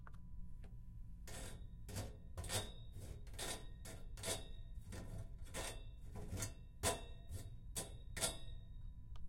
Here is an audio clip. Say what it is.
a cool rhythm
cool, rythm, tap